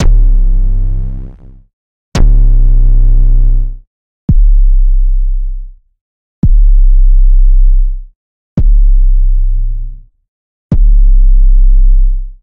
I had used FL Studio 11's 3xOsc to make these. In the piano roll I used the note slider and note properties (like Cutoff, velocity, and Resonance) to modify each body of the kicks. They're all in C so there shouldn't be any problems in throwing it into a sampler and using it. BE SURE to msg me in any song you use these in. :D